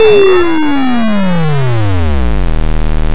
Bassy Sweepdown Talker
8-bit, arcade, chip, chippy, chiptune, lo-fi, noise, retro, vgm, video-game